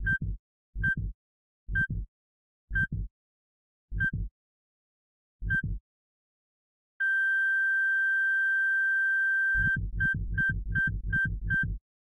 Synthesized heartbeat with heart monitor, goes from slowing to dead to fast, you should be able to cut to use the bits you want.